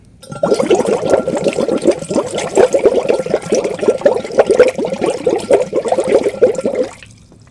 blowing bubbles in a bottle